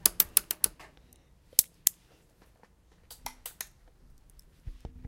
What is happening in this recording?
Here are the sounds recorded from various objects.
clothespin, france, lapoterie, rennes, theictyrings